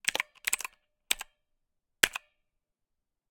Finger pecking typing on computer keyboard
press, keyboard, key, button, click, keypress, computer